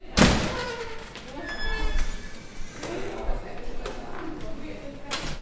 Open and close a creaky door
door, open, UPF-CS14, creak, close, campus-upf